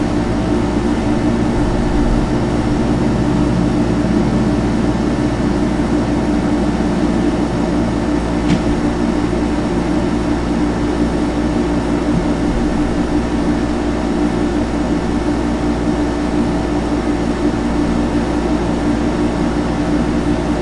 Air Conditioning Ambient sound loop
- A/C unit recorded with Yeti Mic
- Edited with Adobe Audition
- looping sound
ambiance, ambient, ambient-sound, atmosphere, background, background-noise, general-noise, loop, looping-sound, white-noise